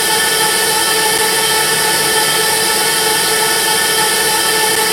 Created using spectral freezing max patch. Some may have pops and clicks or audible looping but shouldn't be hard to fix.
Perpetual, Soundscape, Freeze, Atmospheric